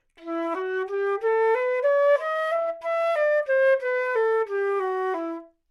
Flute - E natural minor - bad-articulation-staccato
Part of the Good-sounds dataset of monophonic instrumental sounds.
instrument::flute
note::E
good-sounds-id::7271
mode::natural minor
Intentionally played as an example of bad-articulation-staccato
Enatural, neumann-U87, minor, scale, good-sounds, flute